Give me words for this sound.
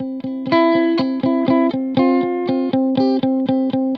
guitar recording for training melodic loop in sample base music
electric, guitar, loop